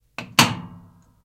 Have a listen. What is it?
washmachine start
close the door at a AEG washing machine